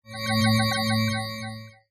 Sound effect for sci-fi browser game